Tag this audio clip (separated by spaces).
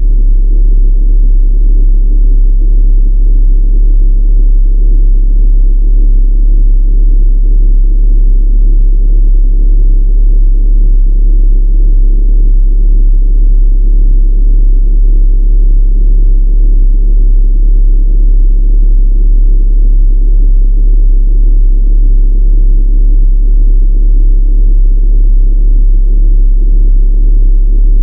drone long subbass